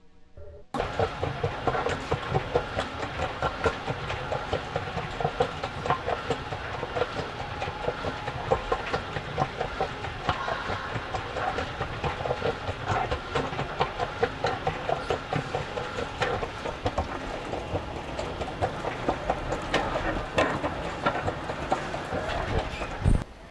I recorded this sound back in 2002. An escalator where the floor was scrapping the ground as it went into the ground.
moving, srcapping